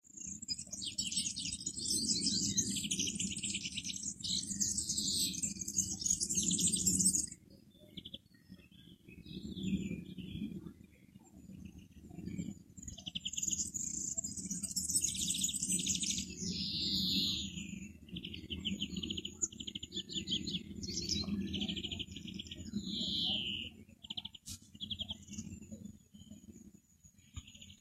field recording of the birds in the garden during the early evening sunset. the birds chirping is accompanied by a distant hum of a remote plane which just happened around at that same time. Brno countryside, South Moravia, Czech Republic, Central Europe.
Recorded by Huawei Prime phone, which unfortunately makes some noise filtering itself.
Record date 02.06.2019